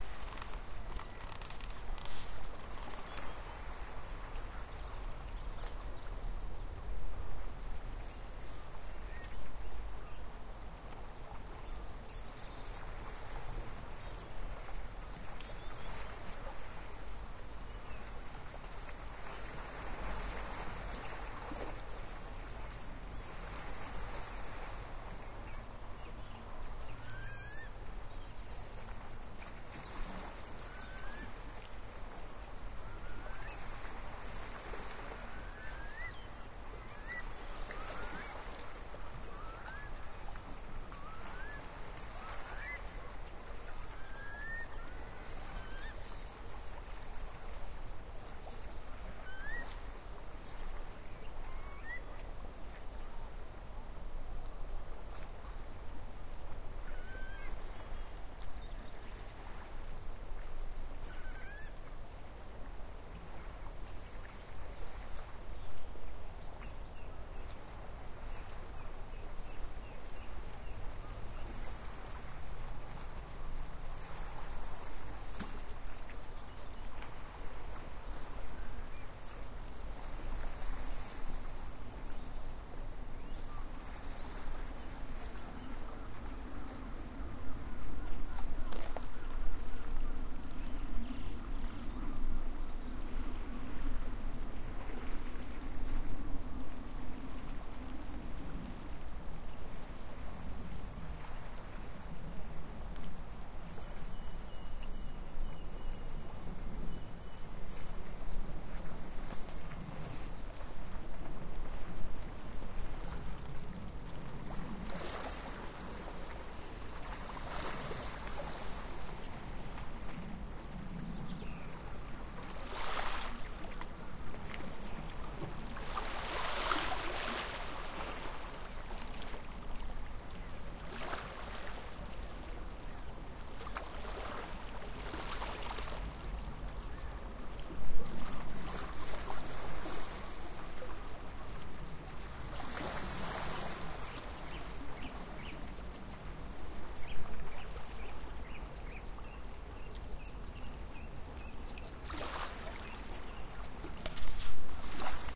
Shoreline Curlew Oyster-Catcher
Water gently lapping against the sea shore with the sounds of seabirds. A song thrush can be heard at the end of the recording.
Birdsong, Curlew, Song-Thrush, Shoreline, Water-lapping, Seashore, Oyster-Catcher